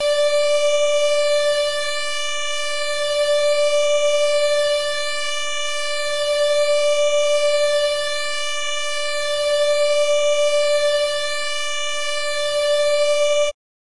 Cool Square D5
D5 (Created in AudioSauna)
analog, square, synth